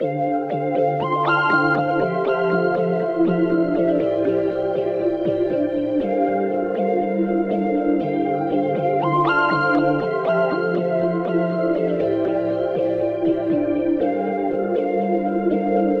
Bubble Kingdom (120 BPM)
Fun little loop in F major 120 bpm created with U-he Diva
120, ambience, ambient, atmosphere, bubbly, chords, dance, electronic, F, loop, loopmusic, major, music, pad, sound, synth